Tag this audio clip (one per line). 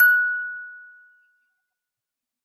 clean
metal
musicbox
note
sample
toy